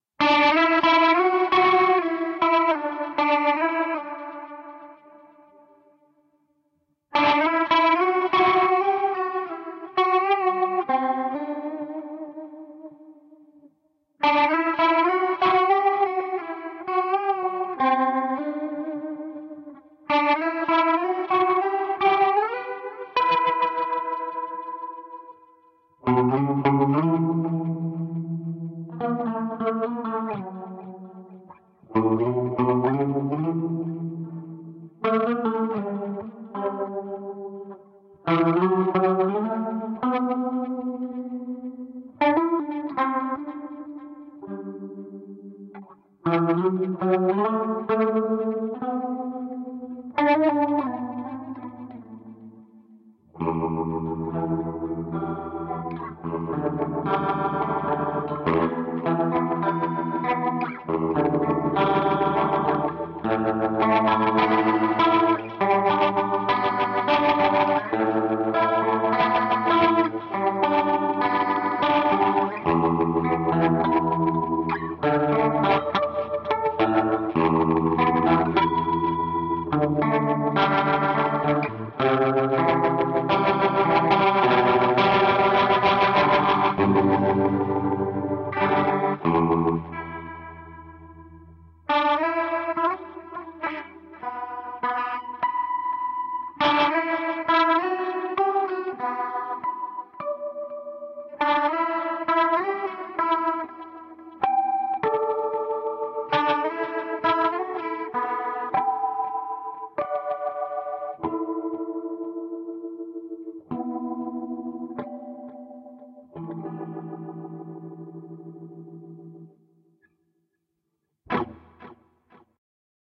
Rotary for rotate
Rotary style of effect with a simple laid back style of lick. Probably good to divide up and for a background piece. Different segments throughout the piece with some changes to the overall vine.
back, chill, delay, effects, electric, guitar, laid, moody, reverb, rotary, rotate, spooky, vibes